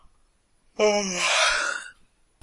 yawning after waking up in the morning
man, morning, yawning